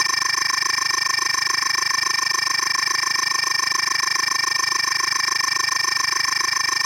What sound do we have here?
OSD text 3
Have you seen films like Terminator, played games like StarCraft Broodwar and seen TV series like X files and 24 then you know what this is.
This sound is meant to be used when text is printed on screen for instance to show date / time, location etc.
Part 3 of 10
film, beep, futuristic, long